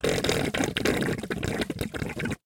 suck in 6
various sounds made using a short hose and a plastic box full of h2o.